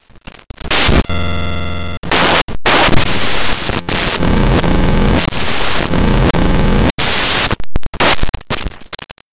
Raw import of a non-audio binary file made with Audacity in Ubuntu Studio
binary, computer, data, digital, distortion, electronic, extreme, file, glitch, glitches, glitchy, harsh, loud, noise, random, raw